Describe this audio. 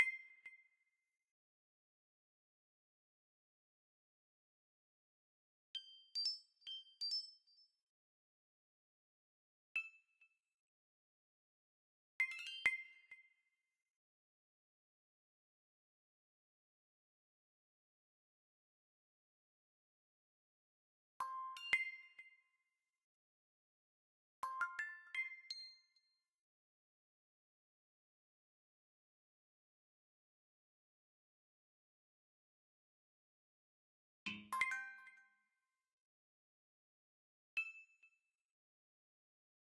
FX Made up mobile phone alerts Samsung iphone CHIMEBOX-1
Samsung or iphone style alert tones, made by converting existing mobile phone tones to midi and then playing with a synth (omnisphere) and changing the odd note.
alert, bong, telephone, buzz, iphone, phone, mobile, samsung, bing